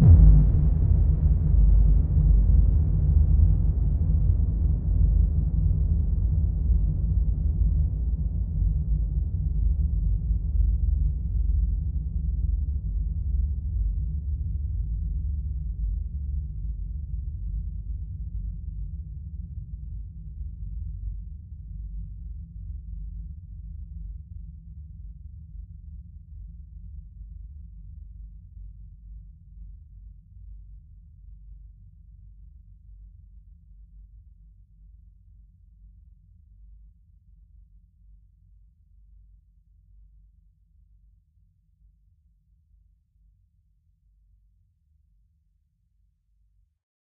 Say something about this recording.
Atomic Bomb 2
Really looong 'atomic bomb' type sound
subs, Rumbleb, Atomic, Bomb, drum, Explosion, lowend